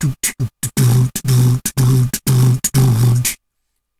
Beatbox 01 Loop 017b DaGlitch@120bpm
Beatboxing recorded with a cheap webmic in Ableton Live and edited with Audacity.
The webmic was so noisy and was picking up he sounds from the laptop fan that I decided to use a noise gate.
This is a cheesy beat at 120bpm with a big boom kick.
Several takes and variations. All slightly different.
beatbox, kick, Dare-19, rhythm, noise-gate, bass, boomy, bassdrum, 120-bpm, boom, loop